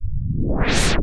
filtered noise, supposed to symbolize 'making something larger'
nice, swoosh, noise, effect, earcon